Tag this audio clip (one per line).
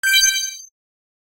click
game
interface
menu
gui
beep
bleep
confirmation
ui
button
alert